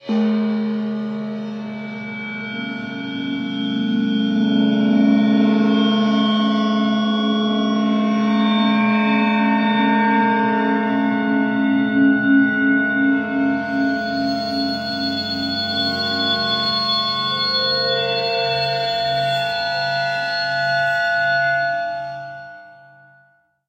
cymbal resonance 7
cymbal processed samples remix
cymbal, percussion, transformation